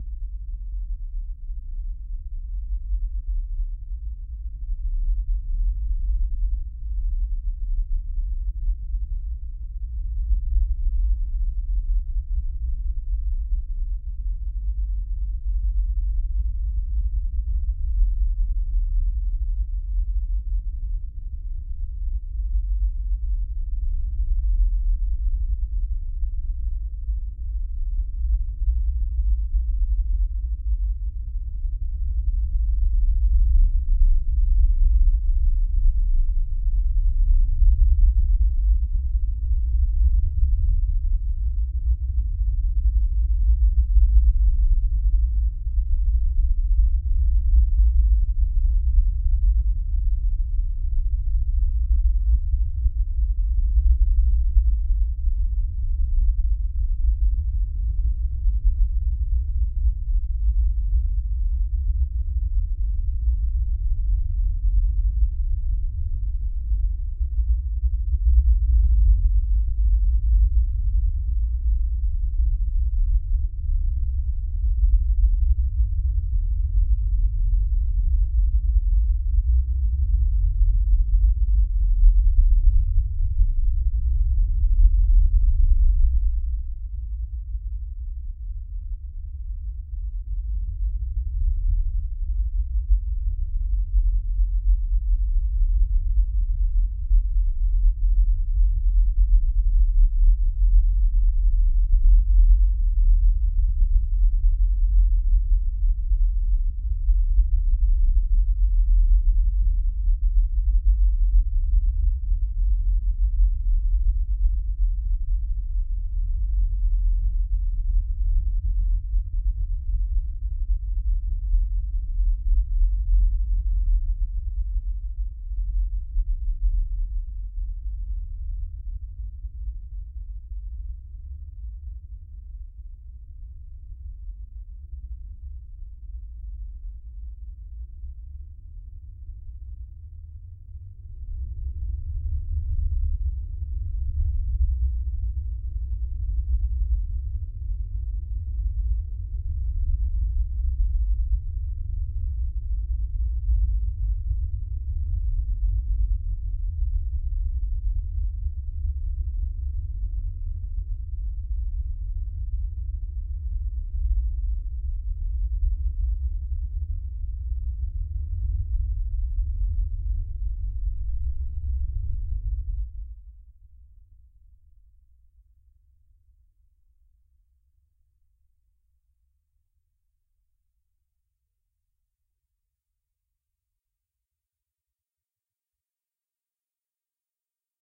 Deep Dark Bass
I have created this bass out of my voice, it is pretty rough for ears if you have got a good headphones or repro. I had to widen it and make it sound more dynamically. Enjoy!
ambient, anxious, bass, cinema, dark, drama, dramatic, field-recording, filter, plot, psycho, sinister, spooky, terrifying, terror, thrill